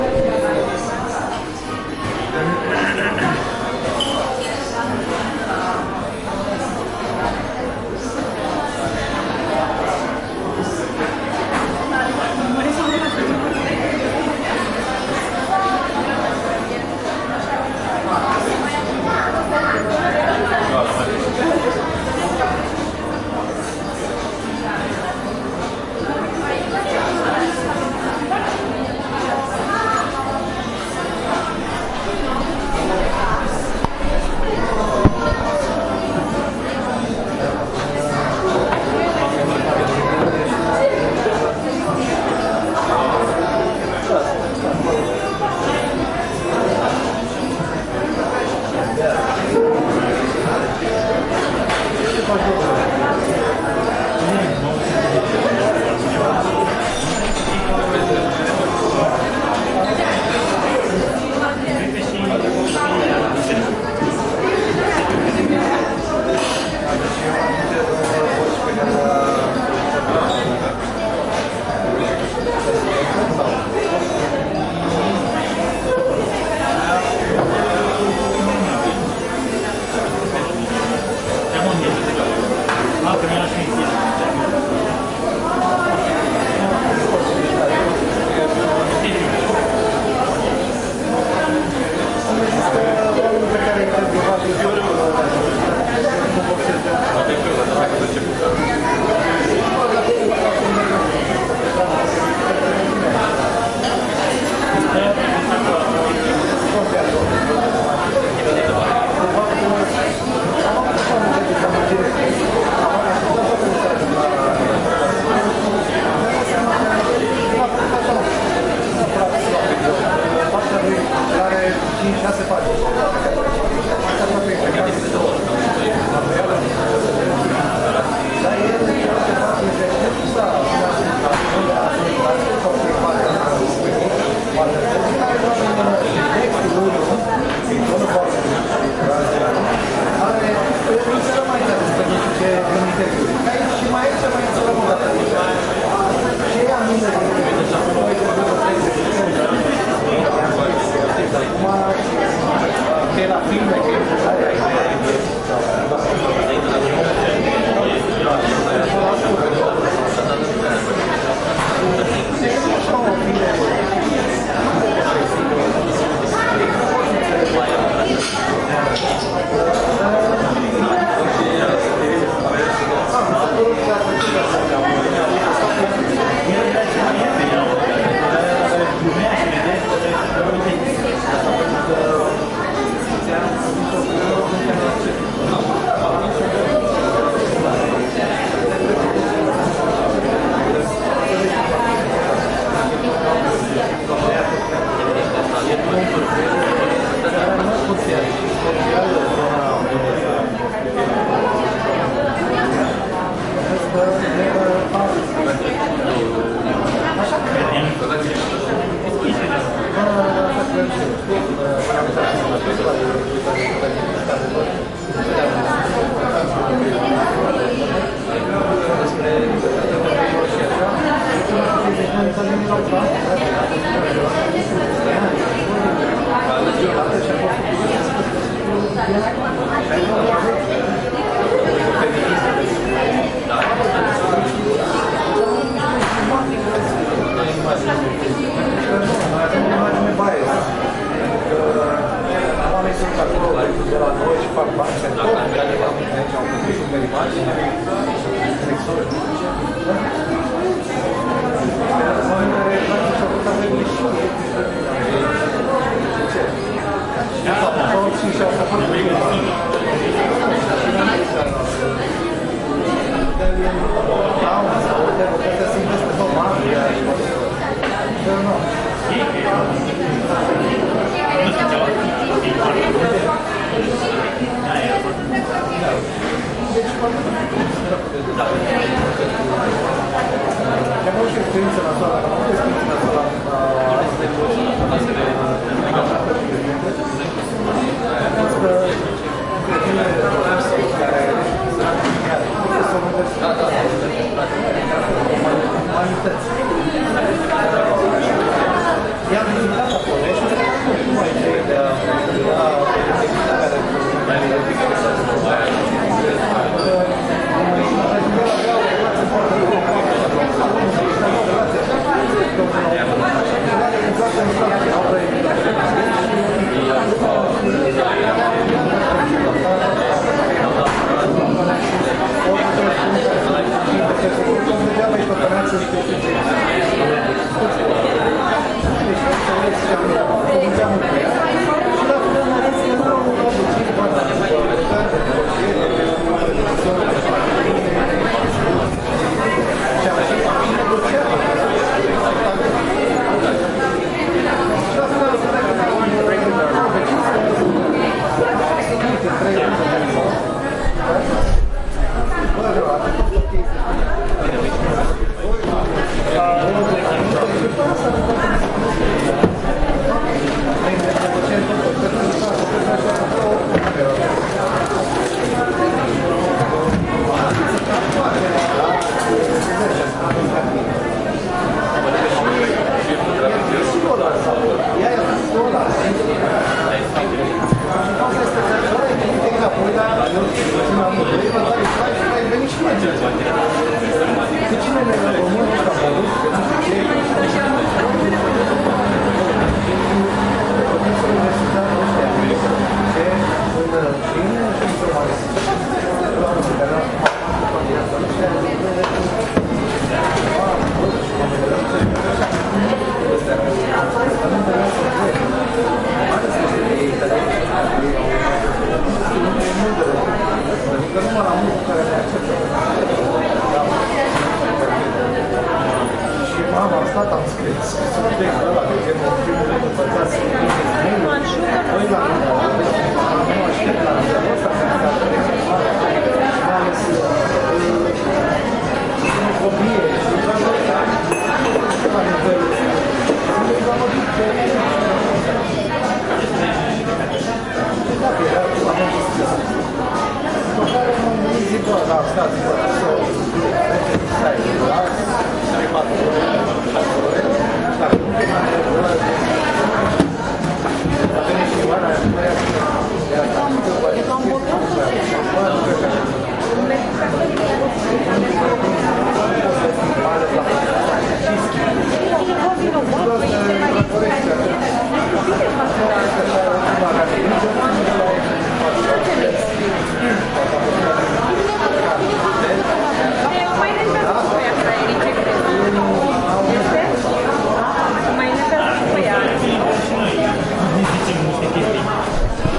Recorded in Bucharest at KFC Unirii in 2017, June.